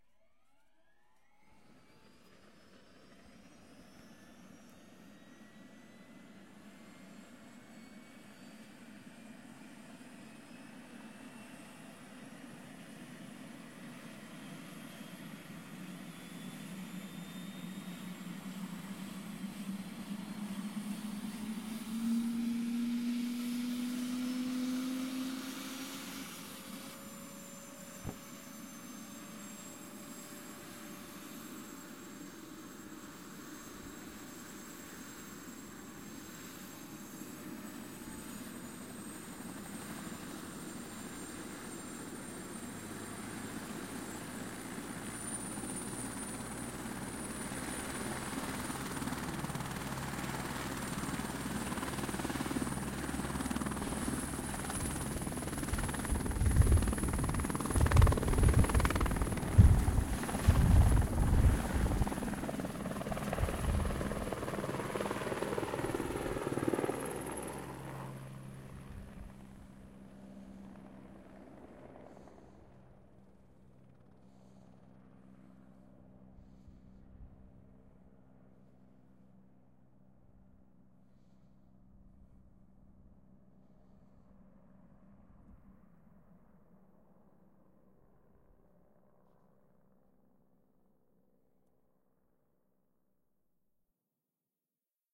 EuroCopter AS350B3 take-off
helicopter, take, EuroCopter, off